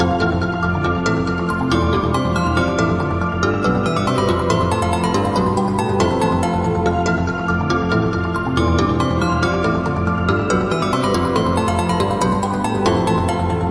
short loops 27 02 2015 4
made in ableton live 9 lite
- vst plugins : Alchemy
- midi instrument ; novation launchkey 49 midi keyboard
you may also alter/reverse/adjust whatever in any editor
gameloop game music loop games dark sound melody tune techno pause
dark, game, gameloop, games, loop, melody, music, pause, sound, techno, tune